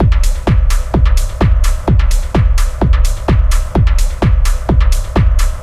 Dark Techno Sound Design 09
Dark Techno Sound Design